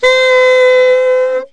Alto Sax 2 growl c4
The second sample in the series. The format is ready to use in sampletank but obviously can be imported to other samplers. This sax is slightly smoother and warmer than the previous one. The collection includes multiple articulations for a realistic performance.